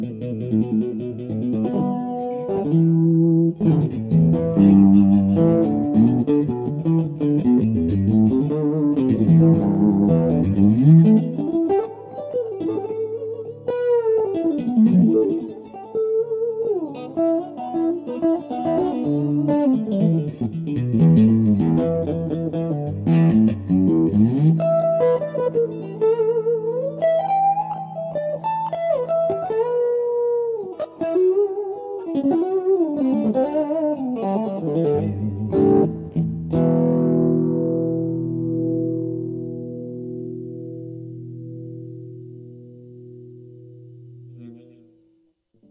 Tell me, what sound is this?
Guitar Jam 2 - S R V Wanna Be 1
I love Stevie Ray Vaughan. This is an attempt. I used a Vox Tonelab with one of the presets, I think Channel #25-ORANGE and a deluxe American Stratocaster. I plugged into a Jamlab 1/4-USB sound card to my computer to record.
blues, fender, guitar, guitar-jam, jam, riff, s-r-v-wanna-be-1, srv, stevie-ray-vaughan, stratocaster